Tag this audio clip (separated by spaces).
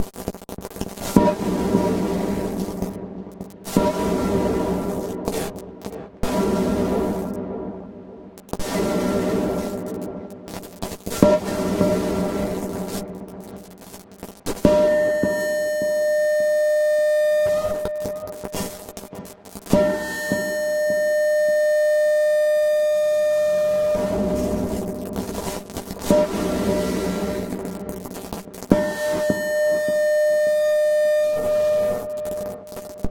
clank drop hit industrial metal-pipe ping resonance ringing scary steel-pipe